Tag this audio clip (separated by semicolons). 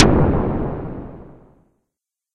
perc; percussion; synth; synthesized; FM; frequency-modulation; collab-1